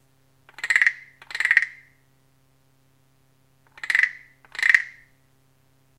This is the sound of a wooden frog -the kind with ridges on their backs and a small stick which is scraped across the ridges to imitate the call of a frog.
atmosphere, frog, percussion, sound-effect, wood